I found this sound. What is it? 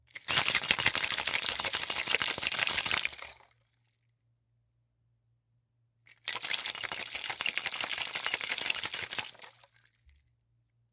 Shaking Martini Shaker Multiple FF302
Shaking martini shaker loud, fast, ice hitting metal surface loud
metal, shaker, martini, Shaking, ice